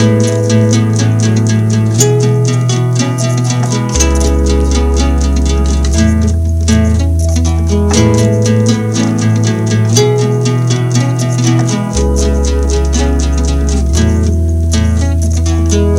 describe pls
STREET PEOPLE Guitar+Bass+Synth
A collection of samples/loops intended for personal and commercial music production. For use
All compositions where written and performed by
Chris S. Bacon on Home Sick Recordings. Take things, shake things, make things.
melody, free, loop, voice, acapella, beat, harmony, Folk, Indie-folk, loops, bass, drums, guitar, drum-beat, whistle, sounds, indie, original-music, rock, acoustic-guitar, synth, vocal-loops, samples, looping, percussion, piano